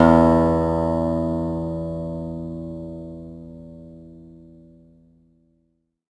Sampling of my electro acoustic guitar Sherwood SH887 three octaves and five velocity levels